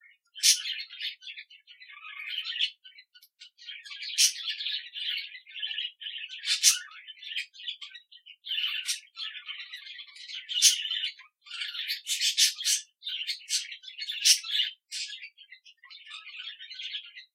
Budgies Chirping
Chirping budgies recorded with a Zoom H4n. Processed with Audacity.
chirping, chirp, warble, budgie